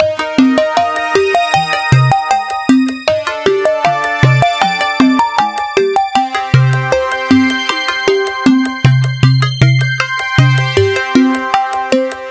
This is a loop created with the Waldorf Attack VST Drum Synth. The kit used was Analog 2 Kit and the loop was created using Cubase 7.5. Each loop is in this Mixdown series is a part of a mixdown proposal for the elements which are alsa inclused in the same sample pack (20140525_attackloop_78BPM_4/4_Analog_2_Kit_ConstructionKit). Mastering was dons using iZotome Ozone 5. Everything is at 78 bpm and measure 4/4. Enjoy!